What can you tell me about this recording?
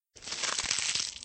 Filthy Sound (e)
Sound of leafs being crushed. Then processed so it sounds filthy and creepy. Recorded with a phone and edited with audacity.